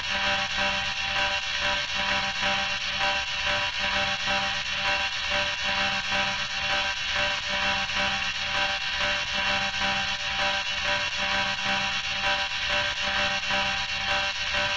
130 bpm C Key 03
delay, bpm, 130, alarm, rhythm, processed, techno, electronic, experimental, electro, distortion, noise, beat, rhythmic, synth, loop